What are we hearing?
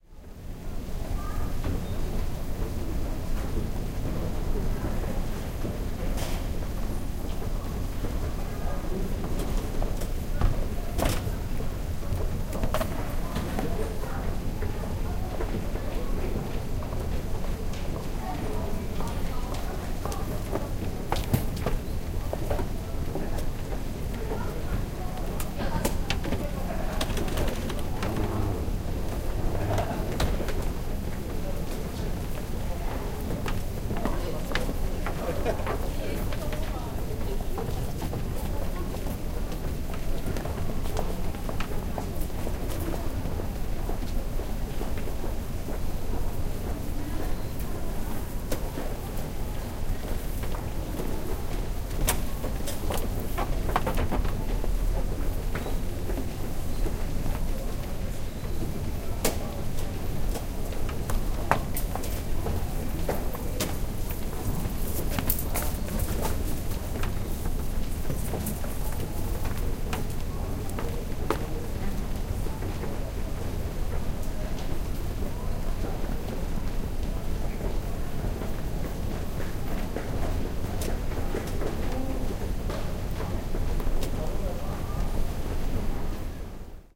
0016 Mechanical stairs
Beginning mechanical stairs people walk. Suitcase. Metro station
20120112
field-recording, footsteps, korea, seoul, stairs